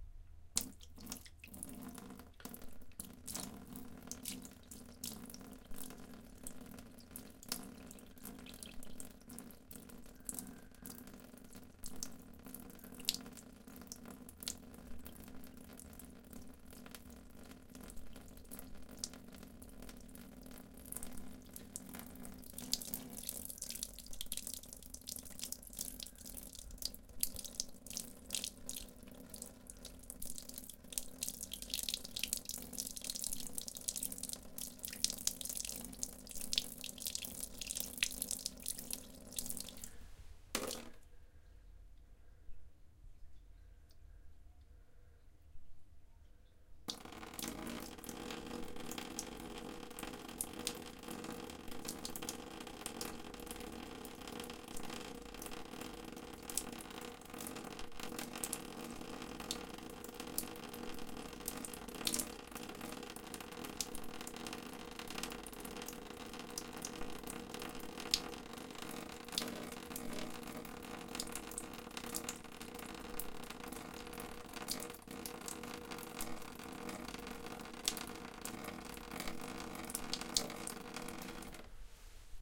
Water Dripping 04
Water dripping. Recorded with Zoom H4